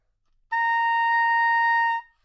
Part of the Good-sounds dataset of monophonic instrumental sounds.
instrument::oboe
note::A#
octave::5
midi note::70
good-sounds-id::7982